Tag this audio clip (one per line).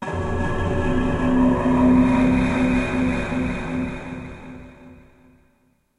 horror
horror-film